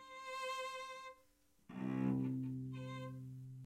cello random2notes lowhigh
A real cello playing 2 random notes on different octaves. Recorded with Blue Yeti (stereo, no gain) and Audacity.